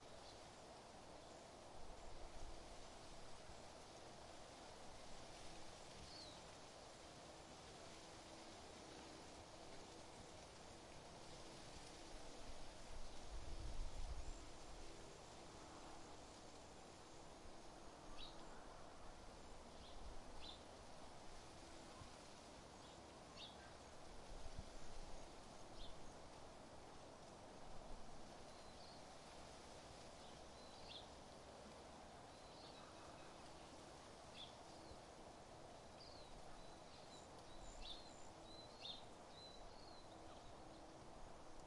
Garden Ambience
Ambience in a garden on a slightly breezy day. Includes some bird sounds. Recorded with a Zoom H4N.
birds, birdsong, breeze, field-recording, garden, nature